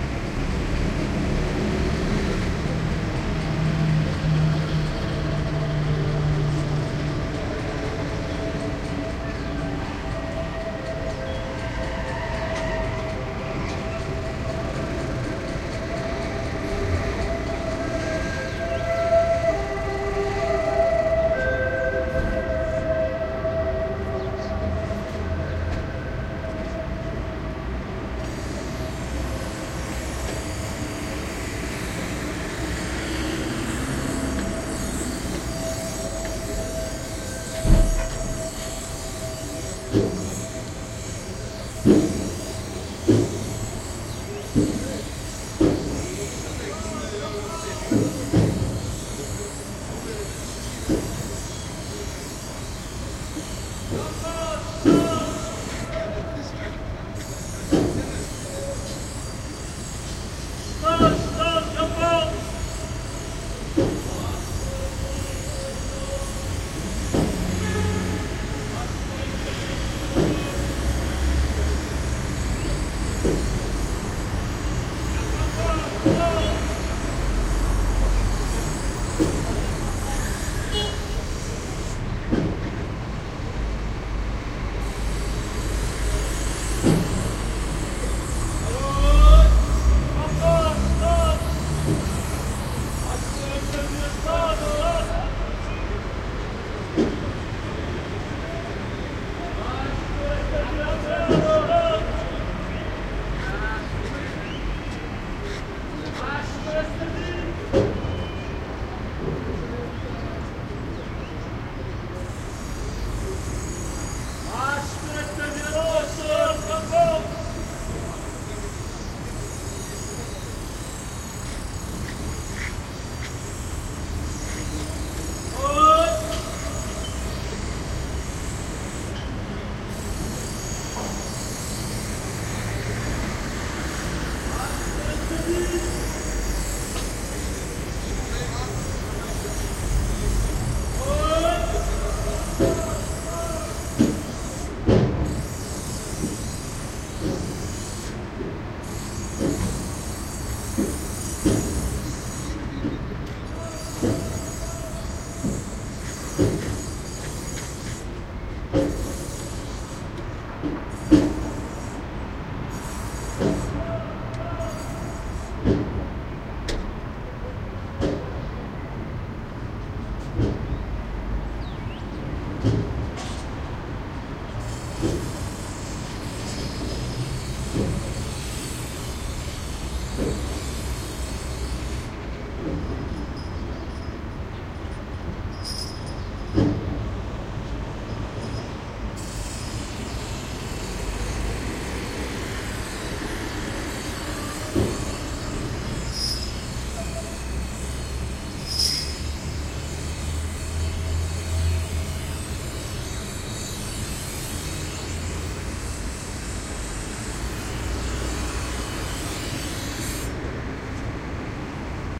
Tangier-street workers
Typical daytime street activity nearby Tangier seashore area.
Zoom H2
Tangier, Morocco - january 2011
Tangier, yell, street, Morocco, tools, field-recording, industry, spoken-words